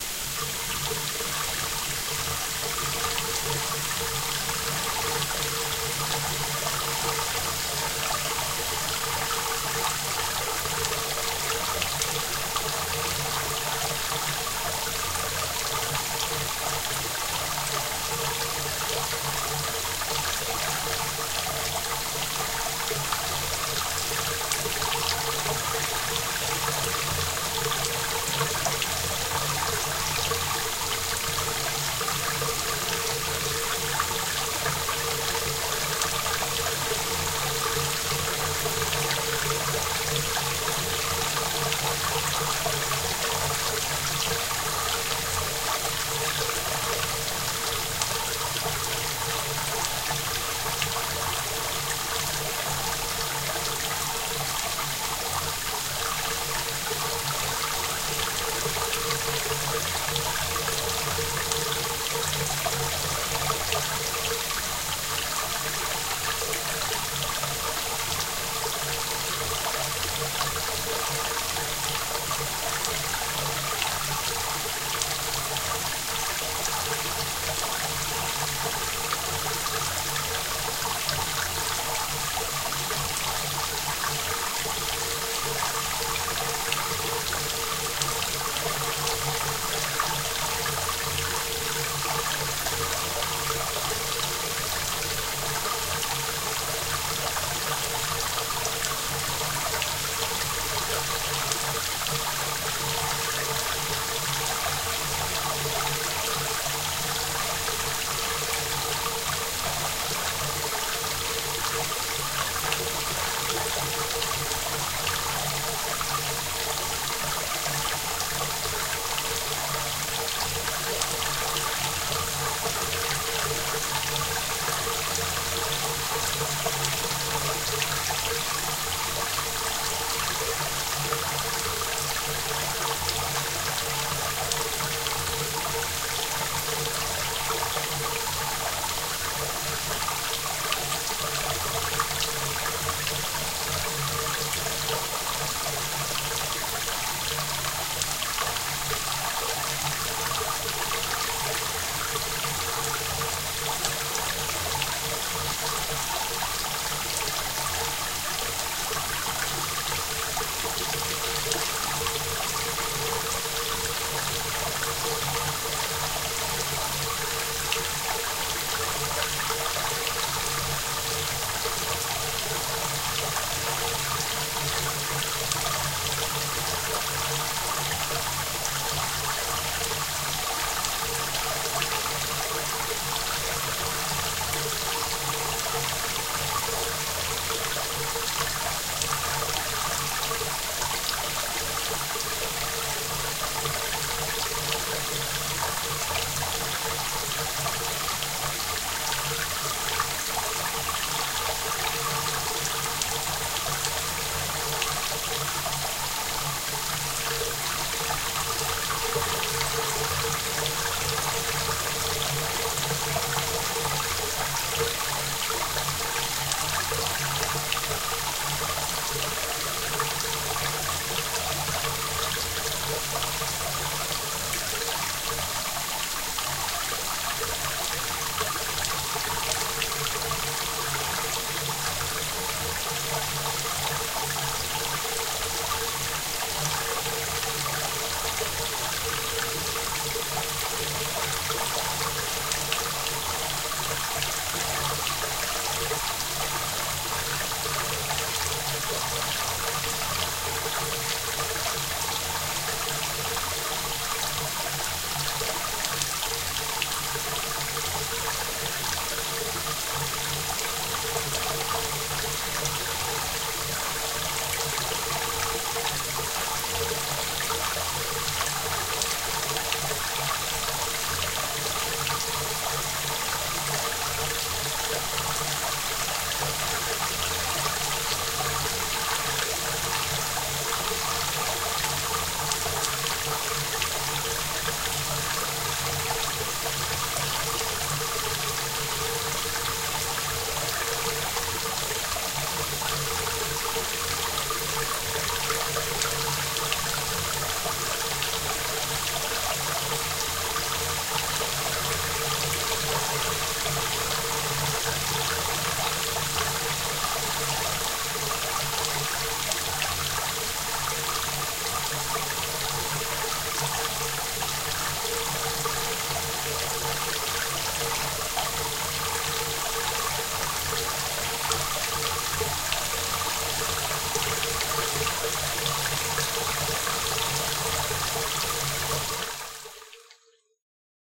Five Minutes of Rain (without reverb)
Five minutes of rain, artificially created. Same mix as my other "Five Minutes of Rain" upload, but without the reverb in case you want to add your own.
Process:
Using a Blue Yeti USB condenser mic on the cardioid pattern, I made a 30-minute recording of sounds from my sink (drips, splashes, different faucet pressures) and cut them up into 60 files, later edited down to 20. These were layered together and mixed with white, pink, and Brownian noise.
Slight noise removal applied. Recorded basic mic noise, and used a subtractive processor (ReaFir in Reaper) to remove that sound from the final mix.
drain, drip, dripping, drizzle, drops, foley, outdoors, outside, rain, raining, shower, splash, splashes, splashing, storm, trickle, water, weather, wet